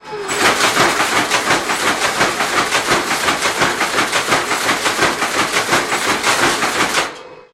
Recording of a weaving-loom in a dutch museum.